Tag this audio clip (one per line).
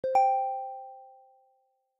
alert
chime
ring
ringtone